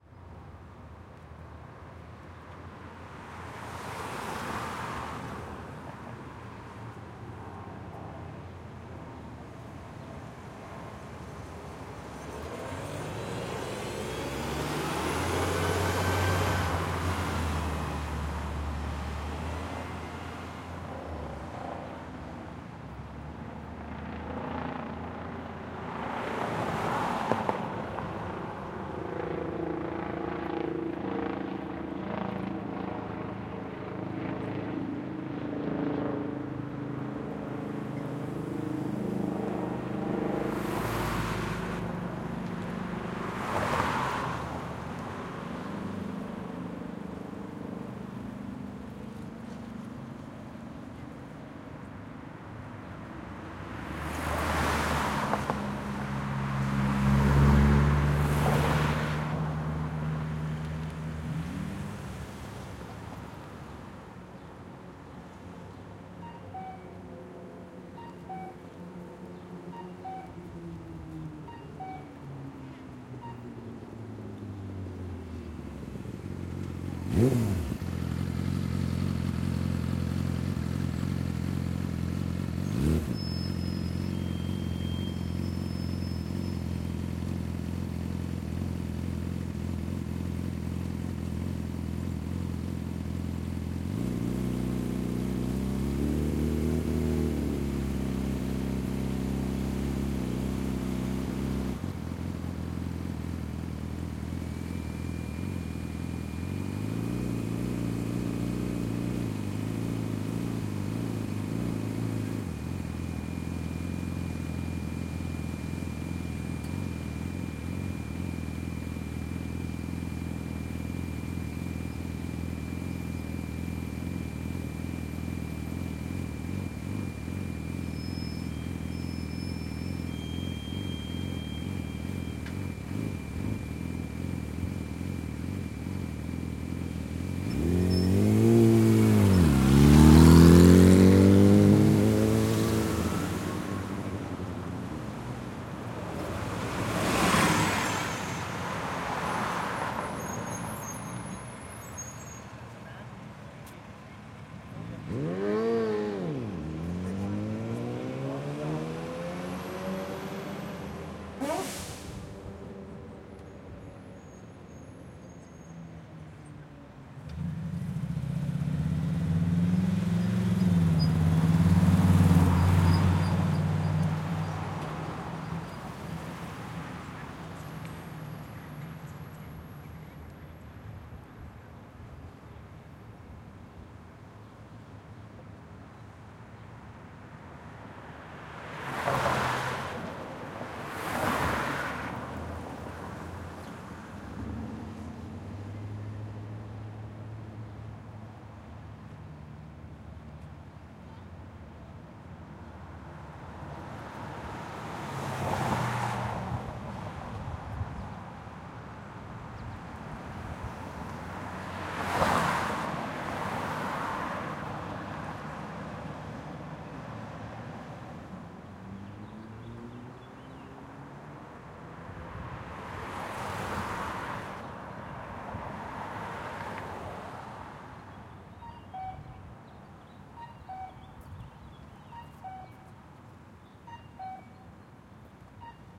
2 - Forbes & Morewood Intersection - Trk-4 South
Field Recordings from May 24, 2019 on the campus of Carnegie Mellon University at the intersection of Forbes and Morewood Avenues. These recordings were made to capture the sounds of the intersection before the replacement of the crossing signal system, commonly known as the “beep-boop” by students.
Recorded on a Zoom H6 with Mid-Side Capsule, converted to Stereo
Editing/Processing Applied: High-Pass Filter at 80Hz, 24dB/oct filter
Recorded from the south side of the intersection.
Stuff you'll hear:
Car bys (throughout, various speeds)
Helicopter pass
Crossing signal (1:06)
Motorcycle approach
Motorcycle idle for long time
Motorcycle pass
Bus pass
Motorcycle rev
Bus sneeze
Quiet voices walla